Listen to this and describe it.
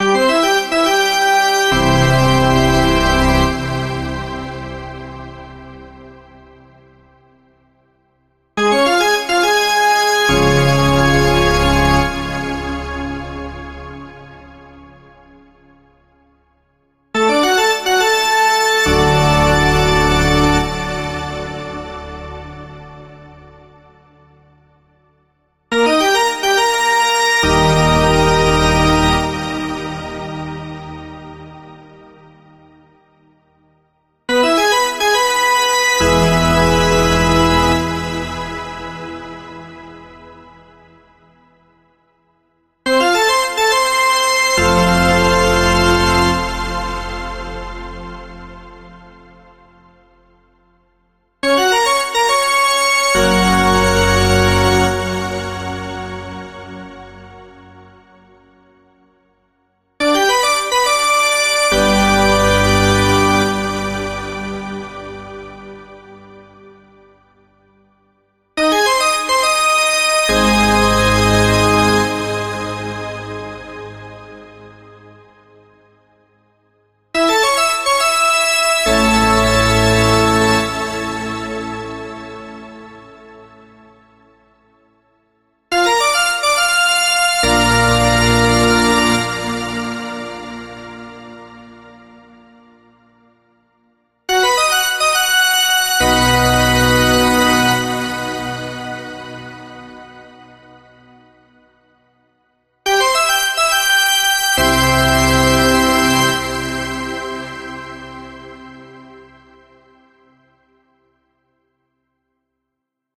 Hockey fanfare 2a
A crowd energizer that is played in down times during hockey, baseball and other sports. Recorded over the semitones in 1 octave. This one is played by a pipe organ.
baseball, crowd, engage, football, hockey, pleaser, socker, sports, teaser